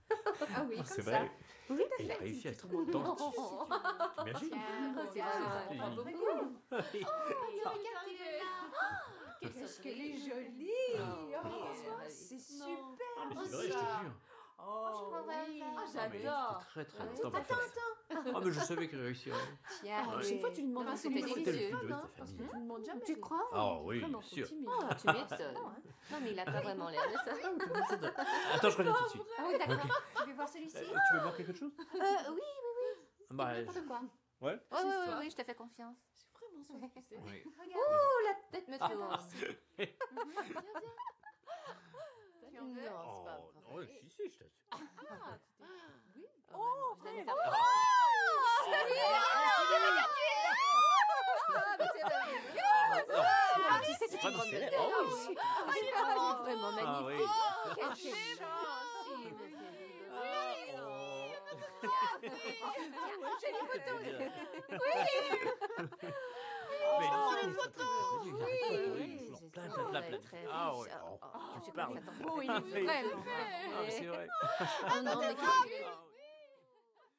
Interior vocal (French) ambiences: garden party or reception for a non-specific celebration